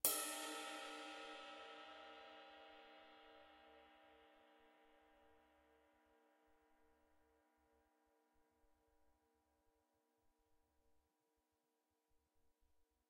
20" stagg sh ride recorded with h4n as overhead and a homemade kick mic.
ride, cymbal, h4n